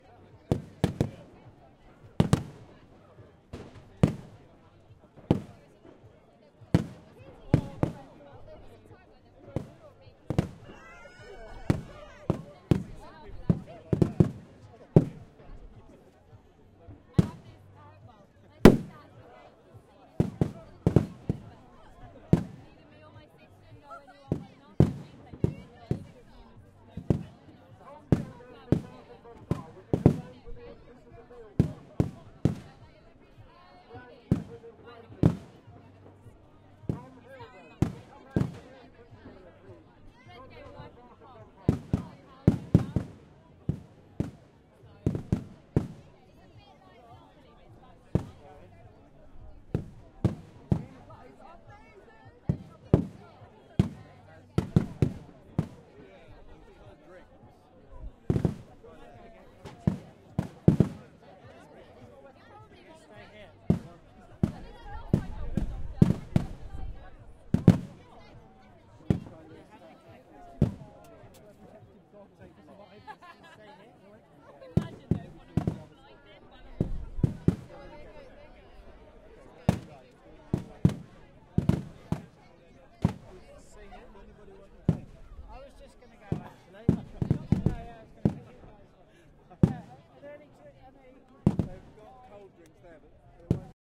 Lewes kik bangs thuds
Bonfire night march in Lewes, England. Lots of crowds shouting at the locals who dress up in period costume and burn effigies of the pope and political figures.
shouting, bonfire, crowds, march, people, fireworks, music